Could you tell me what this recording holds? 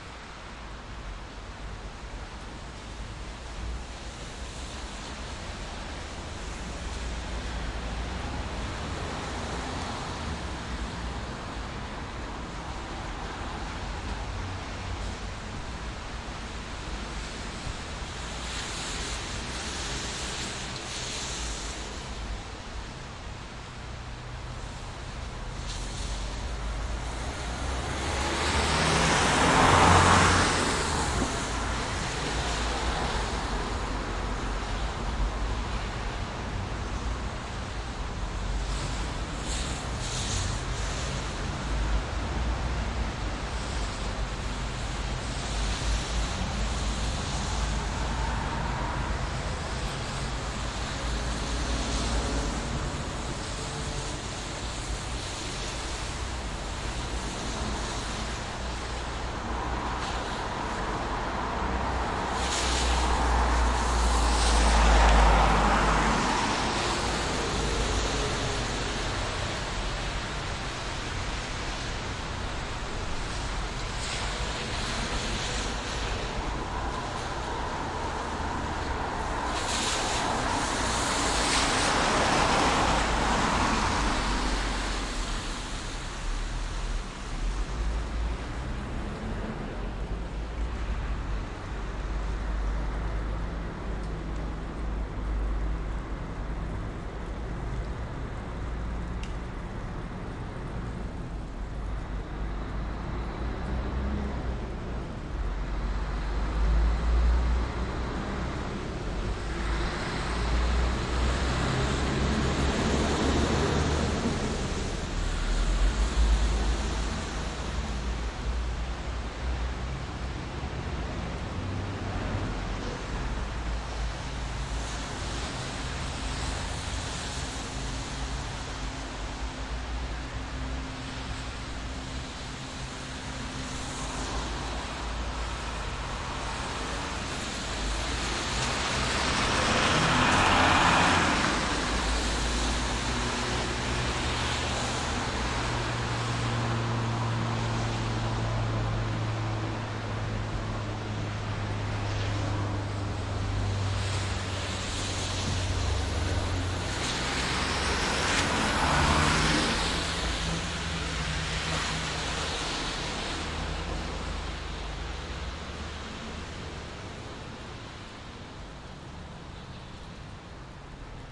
Ambience recording from a balcony in the small city stockerau in austria. It is raining, the street is wet, cars are driving, people are walking around.
Recorded with the Fostex FR-2LE and the Rode NT4.